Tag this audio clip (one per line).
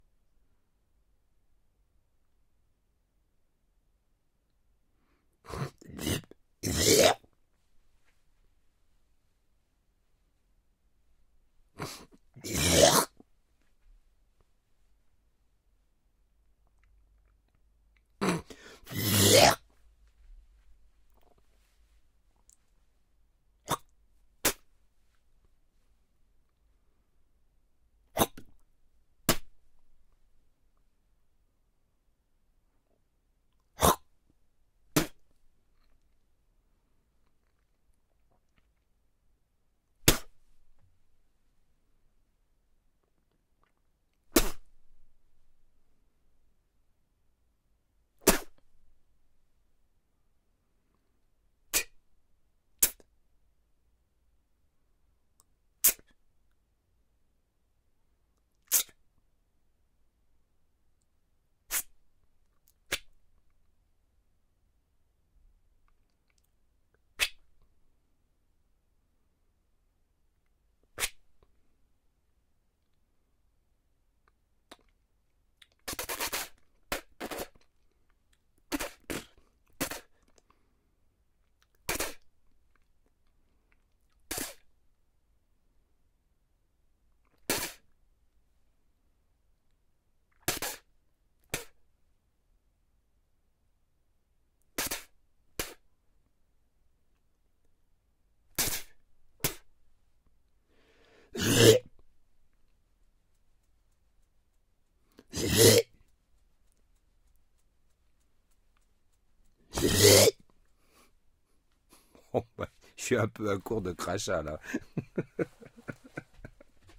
crachat
cracher
spit
crache
spitting
spucken